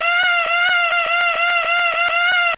Flowers Like to Scream 15
noise, not-art, psycho, screaming, stupid, very-embarrassing-recordings, vocal, yelling